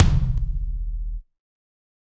Kick Of God Wet 030
drumset, god, kick, realistic, set